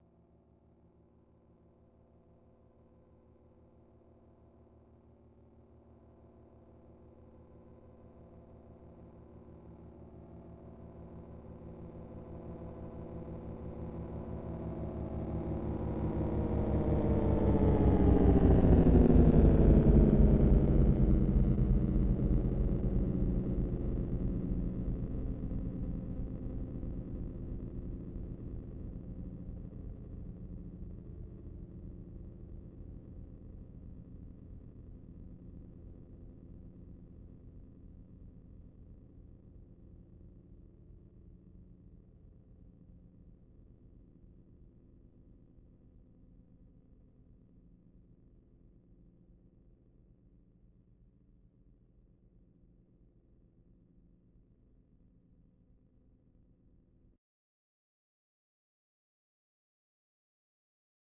some sort of, buss? thing I created in adobe audition.